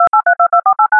vandierdonck-joan-2015-2016-numbering-phone
////////Theme
Phone
////////Description
Noise purely synthesized with Audacity.
Creation of 8 small sounds with different frequencies, with silences between every sound to call back the numbering of a telephone.
//////// Typologie
C’est un itératif tonique, une succession de sons de différentes fréquences.
/////// Morphologie
Masse : groupe nodal
Timbre harmonique : son assez lisse
Grain : plutôt lisse
Allure : stable
Profil mélodique : variation en escalier (différentes fréquences pour chaque son)
call, number